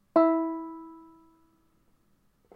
Soft plucking of the E string on a banjolele.